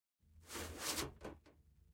Stereo recording of a shoe scraping a brick by Foley artist DeLisa M. White